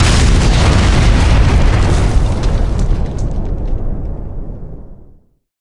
kl ex3
Some explosion sounds I mixed up from various free web sounds i.a.
heavy, boom, impact, explosion, shockwave, bang, far